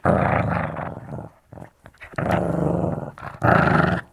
Dog Shih Tzu Growling 04
Shih Tzu dog, growling